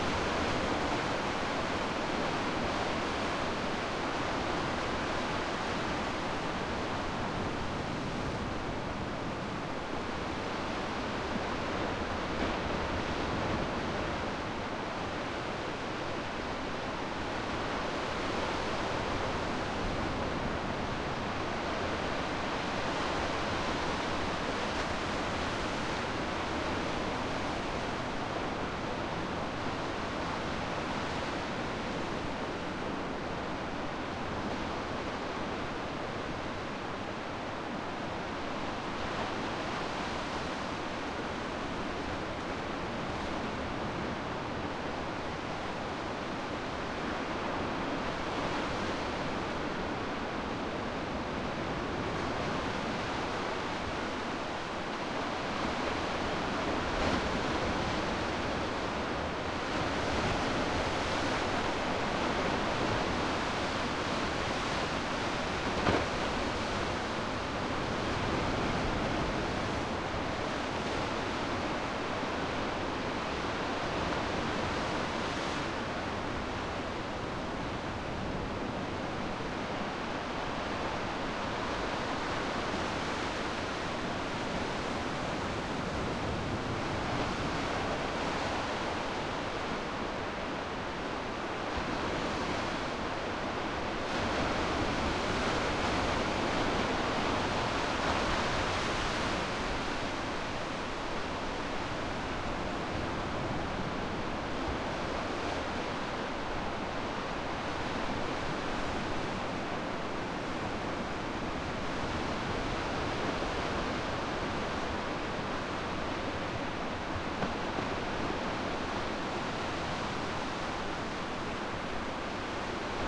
on the cliff top
The waves of the northsea recorded from a cliff top in Cove / Scotland on a pretty windy day. No seagulls. Sony PCM D-50 recorder.
cliff, field-recording, scotland, sea, waves